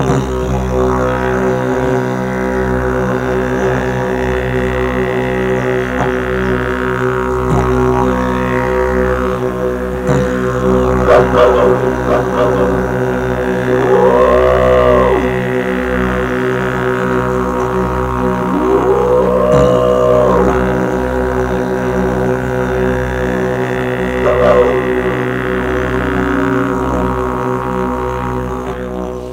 Different sounds with didgeridoo mixed together to get a fuller sound.
It's played by me on a original Didgeridoo from Australia.
Didgeridoo, audacity